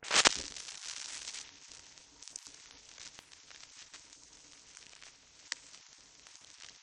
Record Player Needle is dropped & Vinyl crackling
A record player needle is dropped & crackling of the vinyl
vinyl, crackling, drop, needle